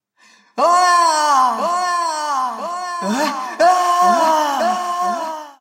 Elf Male Warcry
An elven warcry.
Elven, yelling, battle, warcry, shouting, battlecry, male, war, cry, Elf, echo